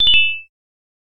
3 down fast 3
collect, energy, game, item, life, object, pick-up